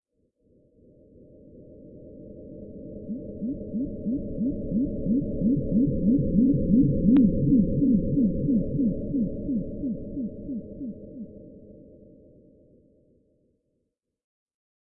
sci fi beam sound